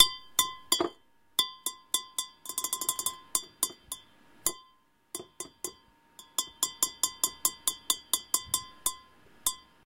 Drink glass tapped several times by my fingernail. Recorded with Edirol R-1 & Sennheiser ME66.